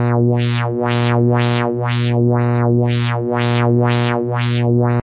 Square Buzz
Same as the Saw buzz, but squared and made with audacity, have fun with it!
square, zap, dubstep, buzz, unfiltered